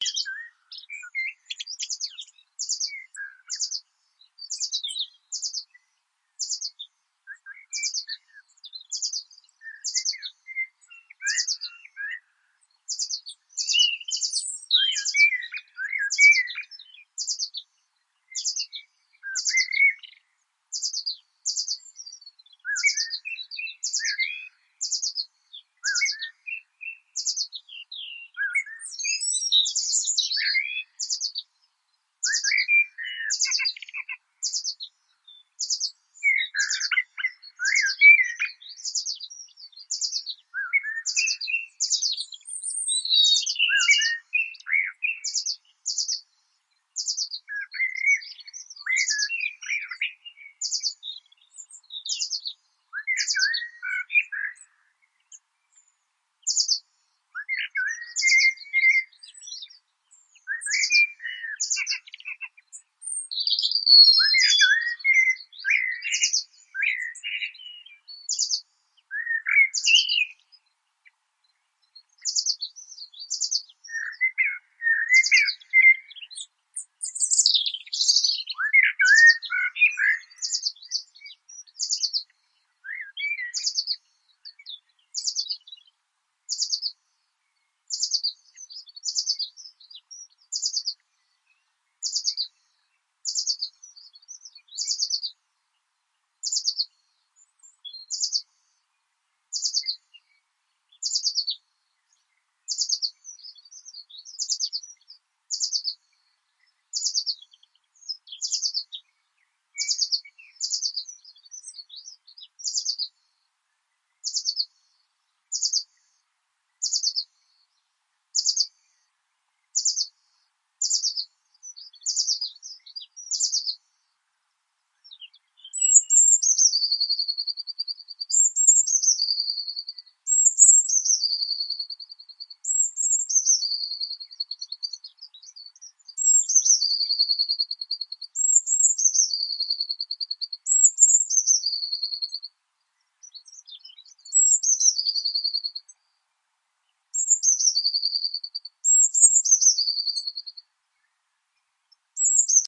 This recording was made during the Corona virus quarantine, meaning no planes, no cars in the background.